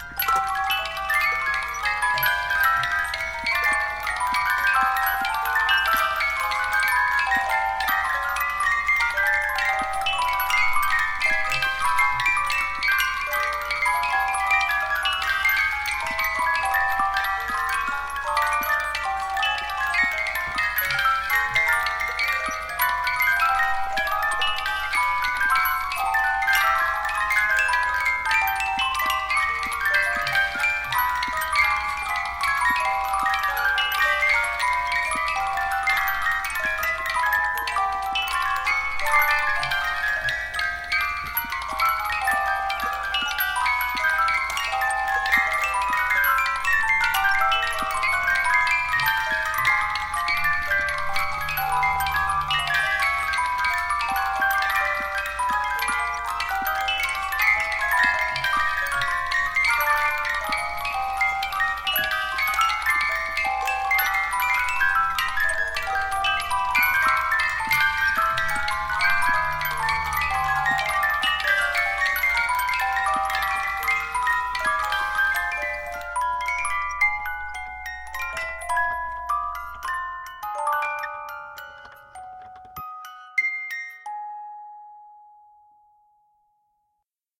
The Dusty Attic of Dr. Benefucio
They are listed below.